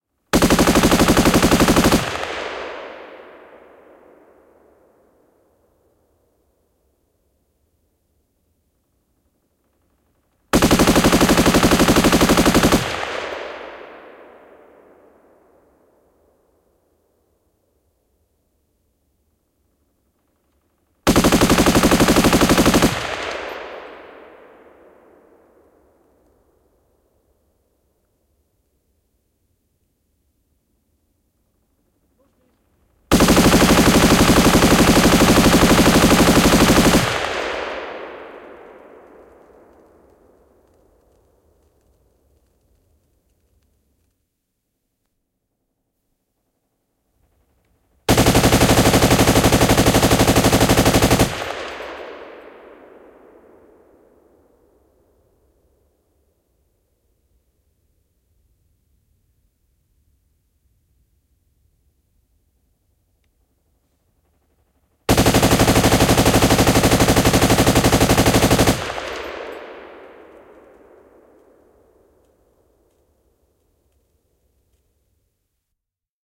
Konekivääri, sarjatuli, ampuminen / A machine gun with an accelerator, Russian Maxim, shooting, sustained fire
Venäläinen kk Maxim. Sarjatulta kiihdyttäjän kanssa, kaikua.
Paikka/Place: Suomi / Finland / Hämeenlinna, Hätilä
Aika/Date: 01.11.1984
Ammunta, Ampuminen, Ase, Aseet, Field-Recording, Finland, Finnish-Broadcasting-Company, Firing, Gun, Gunshot, Konetuliase, Laukaukset, Laukaus, Sarjatuli, Shooting, Shot, Soundfx, Suomi, Sustained-fire, Tehosteet, Weapon, Weapons, Yle, Yleisradio